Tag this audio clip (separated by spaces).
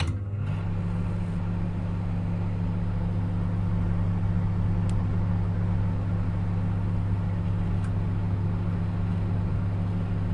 atmosphere microwave noise